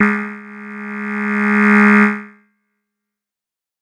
This is one of a multisapled pack.
The samples are every semitone for 2 octaves.